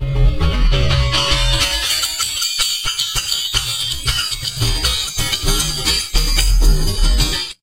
Distorted Tech Noise
this noise was actually made with a kazoo scraping across a heater, just slowed down and made to sound more electronic.
distorted, electronic, interference, kazoo, tech